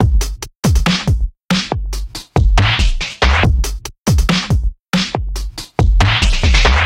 140 dubstep loop 5
Genre: Dubstep
Tempo: 140 BPM
Made in reason
Enjoy!
140, bpm, drum, dubstep, hihat, kick, loop, snare